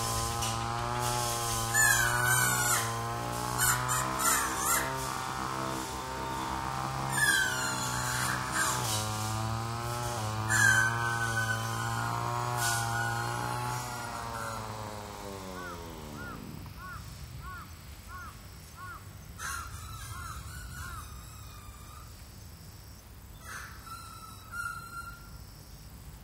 Japan Kashiwa Friend-LeafWhistle Lawnmower
A lawn mower in the Chiba, Japan countryside. And my friend making strange noises by blowing on a leaf.
bird, birdcall, birds, birdsound, Chiba, country, countryside, field, field-recording, Japan, Kashiwa, lawnmover, leafwhistle, nature, outdoors, outside, rural, village, ville